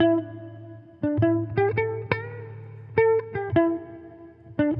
electric guitar certainly not the best sample, by can save your life.